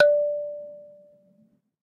SanzAnais 74 D4 doux hp
a sanza (or kalimba) multisampled
african, percussion, sanza